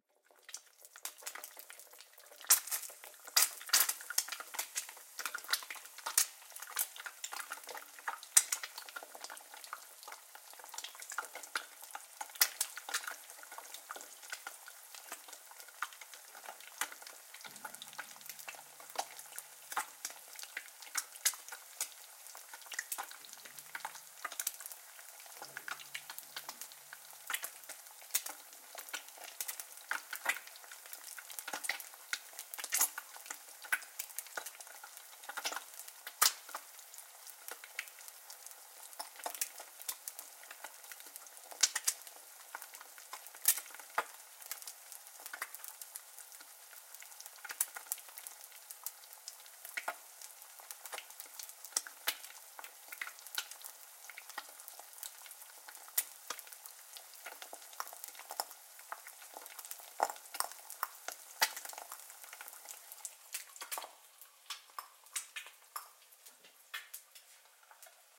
Frying an egg, high heat. Frying sounds only.
EGG, EGGS, FRYING, OWI